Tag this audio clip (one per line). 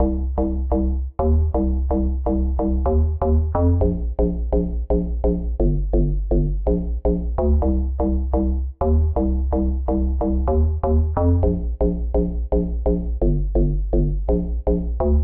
Loop
Bass
Stab
Electric-Dance-Music
EDM
Bassline
House
Electro